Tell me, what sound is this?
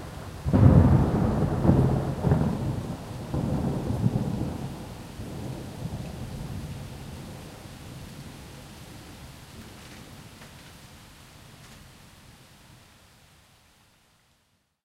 NYC Rain 4 E- thunder rumble.
NYC Rain Storm; Some traffic noise in background. Rain on street, plants, exterior home. Thunder Rumble.
Thunder, NYC, Rain